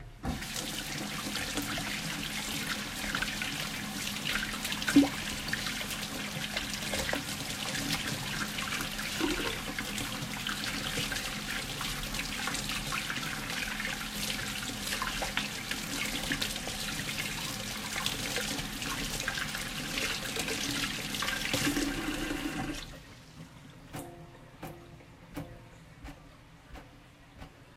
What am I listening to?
Wash hands
People wash the hands at the faucet.
Faucet, Hands, Wash